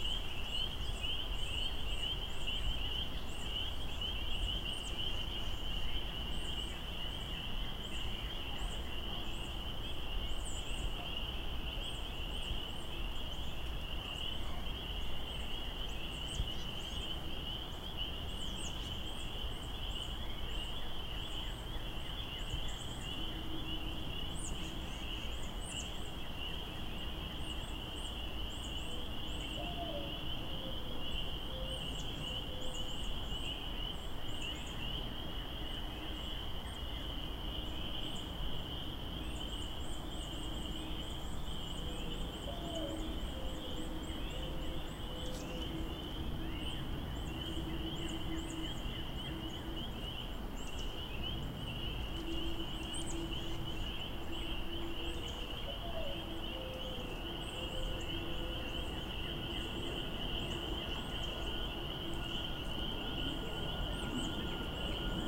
Creek Ambience 2 (plane at end)
Insect sounds prevalent throughout, along with some distant bird noises. Unfortunately at the end you can hear a plane overhead.
ambience, creek, forest, insects, marsh, outdoor